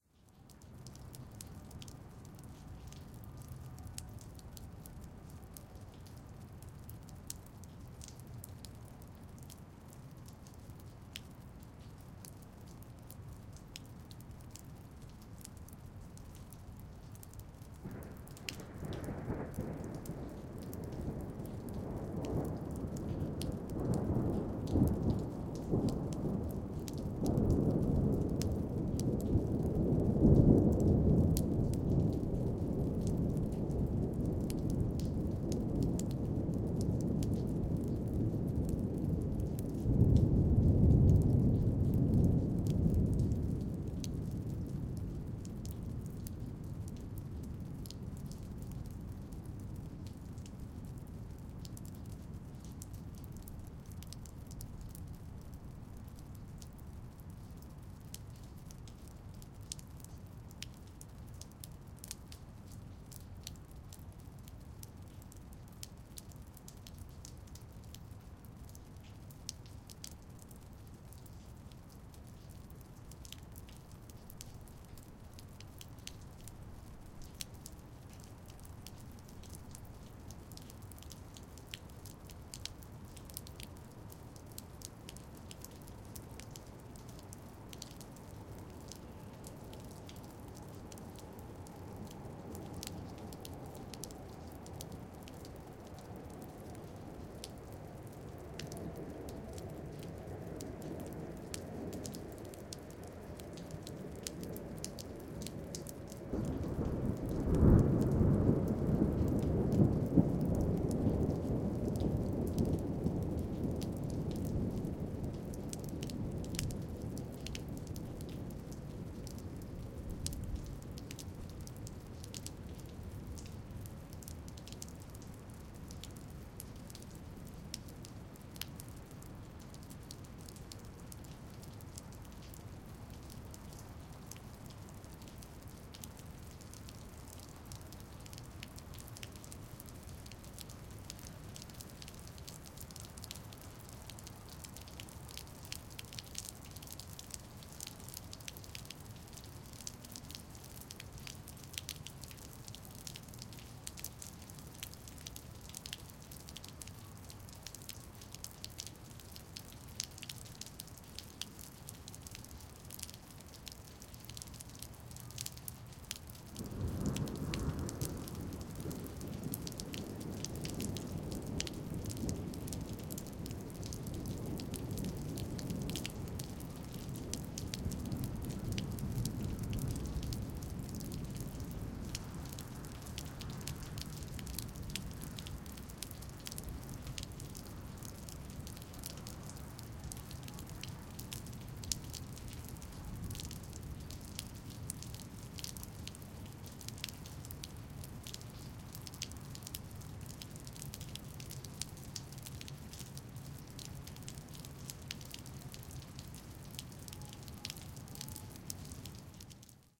Thunder at Deer Grove Forest Preserve (03-08-2009) FRONT
FRONT L+R CHANNELS. A passing thunderstorm and dripping rain recorded on March 8th, 2009 at Deer Grove Forest Preserve near Palatine, Illinois. The recording is slightly edited to prevent it from being too long. The recorder was placed under a picnic area roof, so the water is dripping from that. I think you can almost hear the storm passing from approximately right to left. Recorded using a (slightly wet) Zoom H2 in 4 channel surround mode. Please see also the REAR L+R channels of this 4 channel recording.
traffic, rain, birds, plane, field-recording, outdoors, dripping, surround, thunder